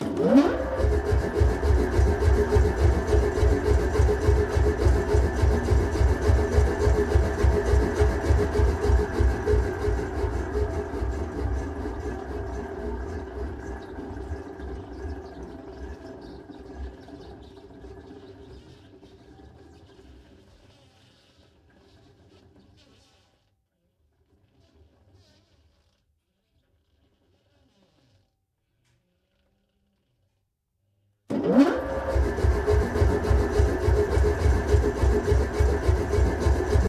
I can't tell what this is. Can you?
On and of air hammer ntg 3
Turning on and of an air hammer getting some cool mechanical sounds.